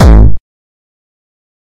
Distorted kick created with F.L. Studio. Blood Overdrive, Parametric EQ, Stereo enhancer, and EQUO effects were used.
bass, beat, distorted, distortion, drum, drumloop, hard, hardcore, kick, kickdrum, melody, progression, synth, techno, trance